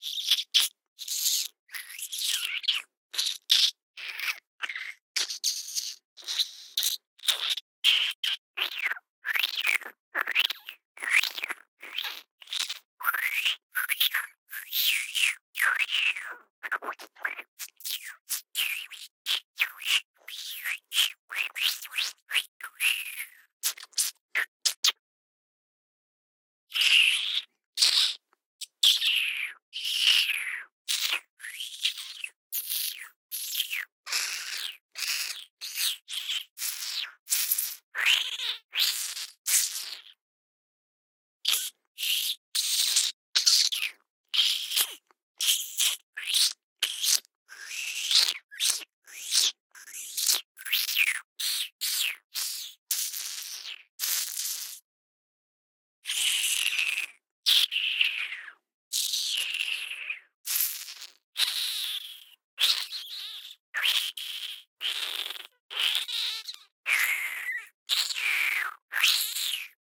An assortment of rat creature vocalizations made using mouth movements. Could be used for any sort of insect- or rat-like creature.
Recorded with a Neumann KM-184 into a Sound Devices MixPre-3 II.
I make sound and music for games.